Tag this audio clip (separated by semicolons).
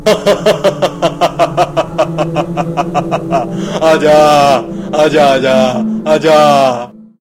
horror; crazy; ghost; evil